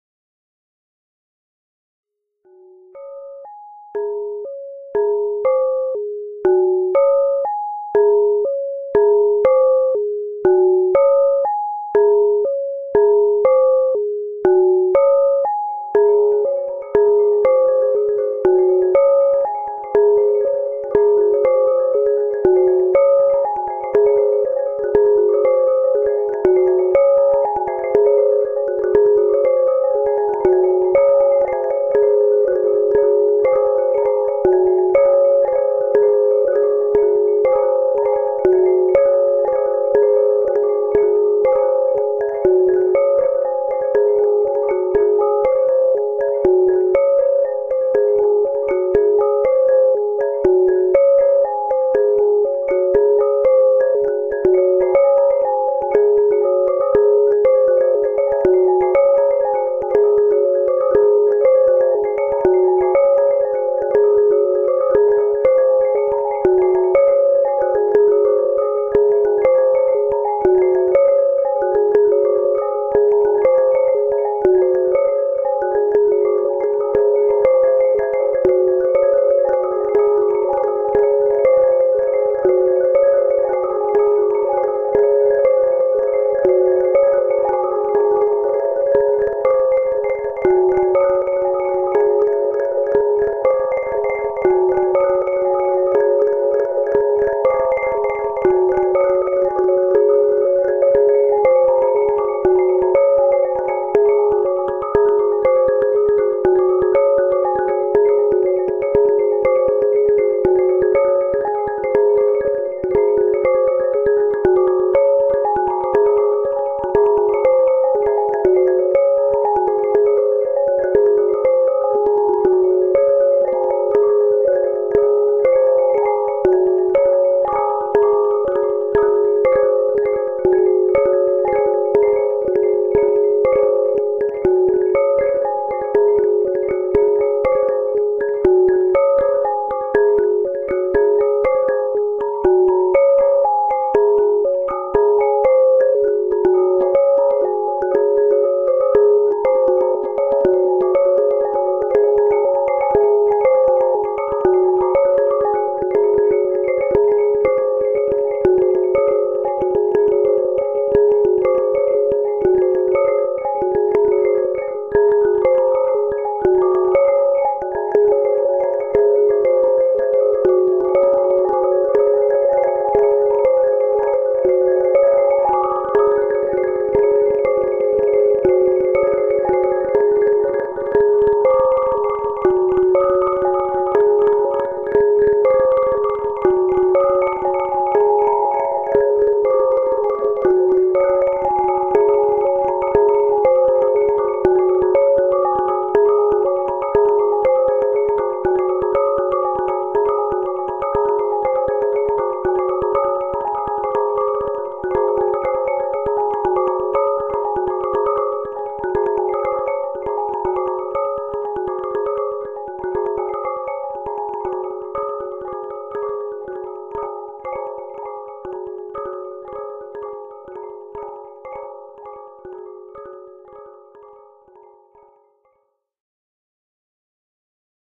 A soothing bell drum chained through delay and a stereo phaser. The delay time is modulated by an odd-length clock trigger via the 8FACE preset sequencer.
ambient, vcv-rack, modular-synthesis, modular, melodic, synthesis, drone